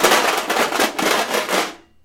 aluminum cans rattled in a metal pot
aluminum, cans